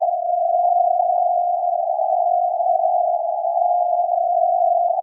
Some multisamples created with coagula, if known, frequency indicated by file name.